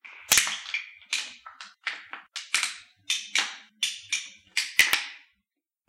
hugues virlogeux01
recorded with a headet
typologie de pierre schaeffer: V'
timbre: rêche
dynamique: attaque agressive, fin plus douce et plus longue
profile de masse normalisation
shaking, knifes